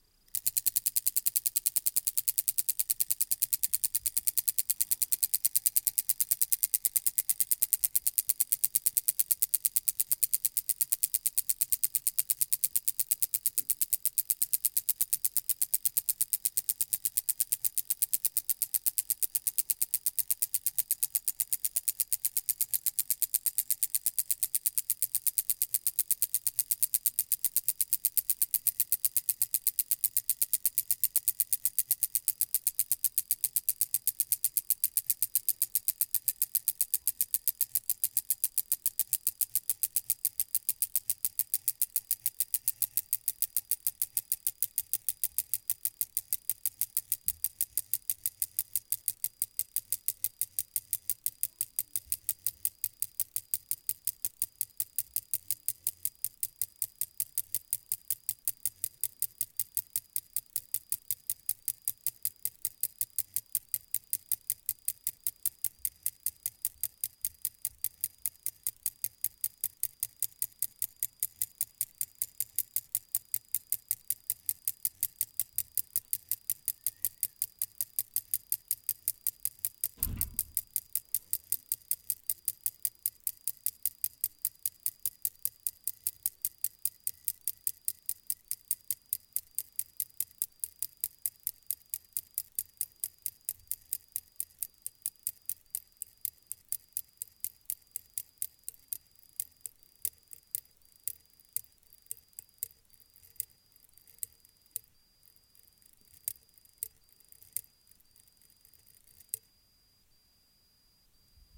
Fast Ticking Slowing Down
A fast ticking noise getting slower towards the end. Made from some sort of clockwork mechanism placed right in from of the mic :)